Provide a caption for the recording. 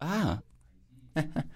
voz de una persona un poco alegre despues de recibir algo [Recorded voice of a person giggling beacause he received something like a gift)
happy, human, smile, voice